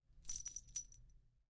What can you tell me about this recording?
Some small keys being jangled.